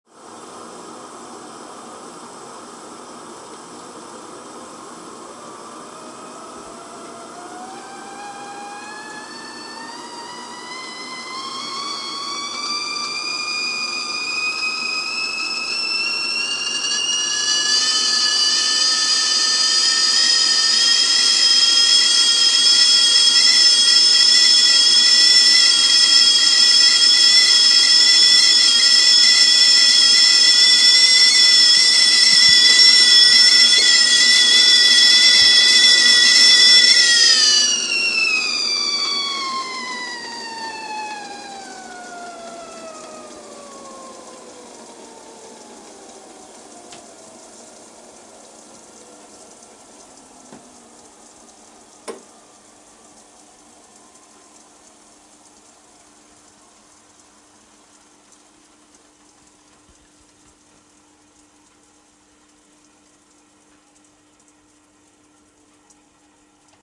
Tea Kettle Whistling On A Gas Stove
boil, boiling, cooking, kettle, kitchen, steam, water, whistle